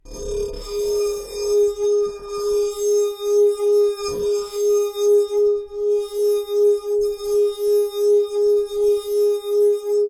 Tono Rugoso Corto
bohemia glass glasses wine flute violin jangle tinkle clank cling clang clink chink ring
ring, chink, clink, flute, jangle, bohemia, clank, tinkle, cling, clang, violin, glass, wine, glasses